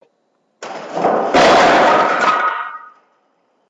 Wood Crash
several blocks on a plank of wood being dropped from about 5 ft. Recorded on a lenovo yoga laptop.
drop, fall, crash, boom, impact, wood